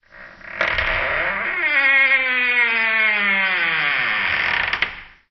Sound of a large door or gate creaking open. Slowed-down version of Creak_3 by HerbertBoland.